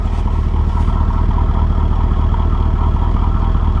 BLUE MUFF Audio1
Muffler sound of 1979 Firebird at idle. Electric Blue Phoenix Arizona USA
1979, Firebird, Muffler